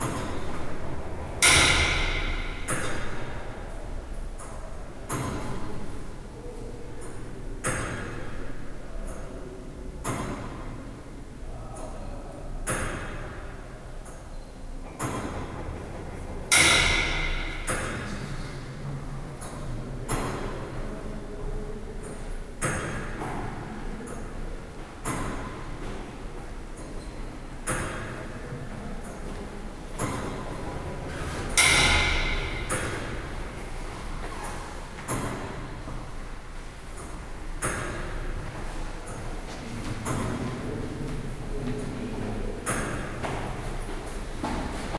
Recorded in a clock tower, Dresden, Germany, July 2011.